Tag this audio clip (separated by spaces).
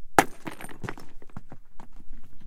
cliff rock throw